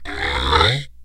low.arc.08
instrument, daxophone, idiophone, friction, wood